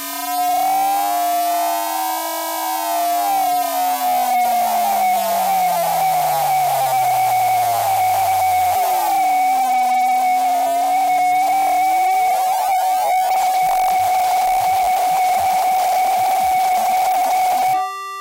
A really harsh and annoying sound from a Nord Modular patch. Not very fun to listen to!
digital, annoying, scream, nord, noise